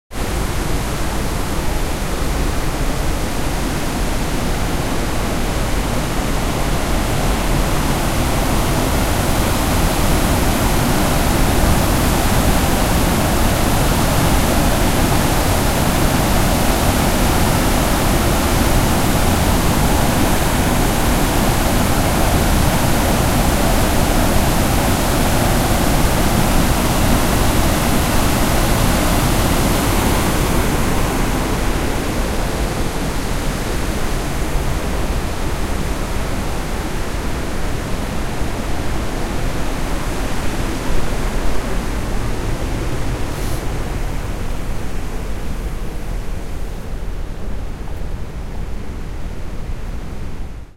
Spokane Falls Waterfall Distant and Near
Walking towards an overlook of Spokane Falls in the middle of the city. Sample ends with walking away from the falls, back towards the city.
Recorded December 2012 in Spokane, Washington with a Roland R-05
2012, approach, crashing, field-recording, jeff-emtman, loud, spokane, spokane-falls, washington, water, waterfall